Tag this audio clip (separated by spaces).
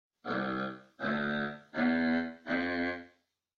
footsteps steps walks